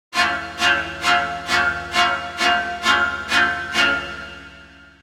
the oldscool scary sound you often hear in movies
Created in fl studio with the vst called sakura
if you want to know the cord, please ask me